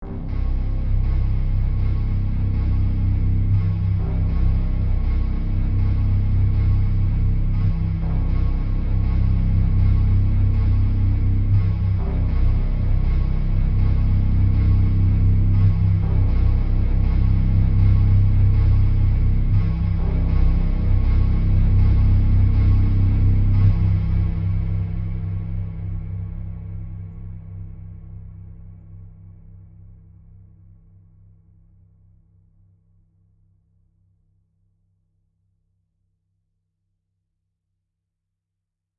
004Suspense Jesus Christ Coming Soon Bass A+B (With Reverb)
Bass suspense loop (39 seconds) All samples combined with reverb